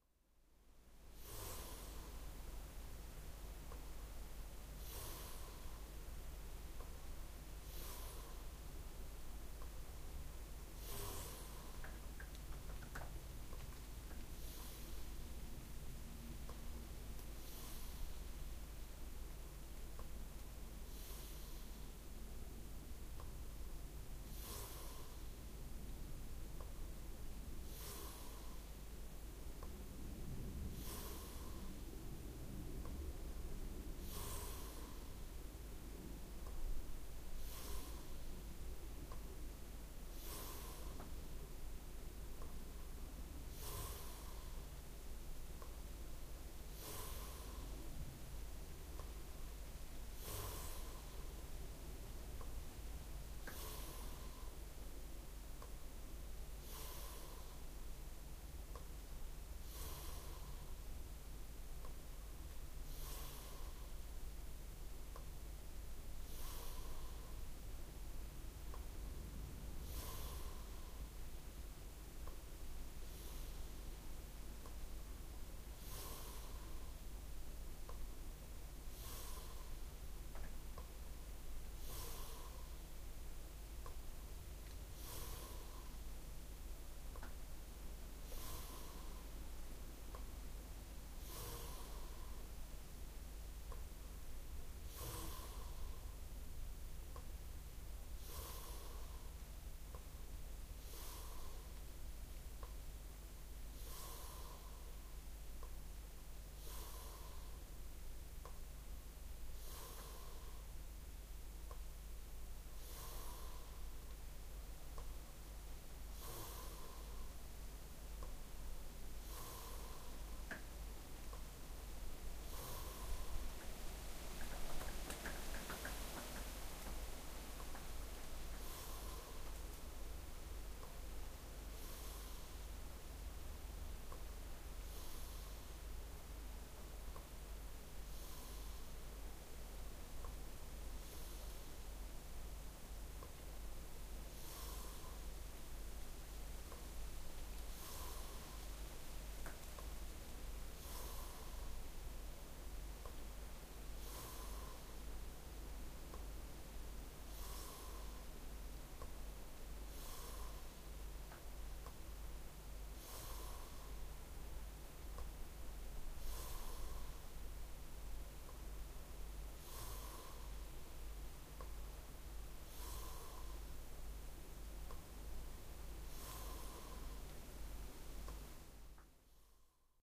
I'm sleeping in a cottage in the woods of "de Veluwe" in the Netherlands. High above me an airplane flies by and there is wind moving the wet trees. Drips of water are falling on the roof of the cottage.
breath, raindrops, nature, water, human, dripping, body, bed, airplane, field-recording